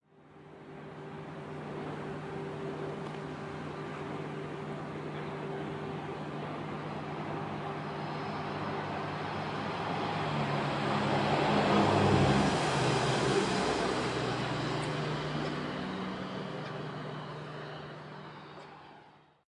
Diesel Train Pass by
Field recording of a diesel passenger train ('Pacer' operated by Northern Rail) passing by. Sorry about the quick ending, but a dog started barking and I had to cut that off as it ruined the recording. (I'll try and get the dog recording up as soon as possible)
I was waiting for about 3 minutes for the train to depart from the station (see geotag) when it began to move off in the distance and passed under the pedestrian bridge over the track I recorded this on. Fair spring evening weather, I had been sick earlier on but had managed to get out to record this- thank heaven!
Recorded 14th May 2015 with a 5th gen iPod touch. Edited with Audacity.
passby, railway, northern, pass-by, bridge, passenger-train, pacer, field-recording, diesel, rail, train, diesel-train, station, engine, passing